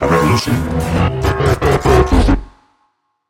Another transformer sound
artificial, computer, design, digital, electric, electromechanics, fantasy, fx, machine, mechanical, robot, science-fiction, sound, transformer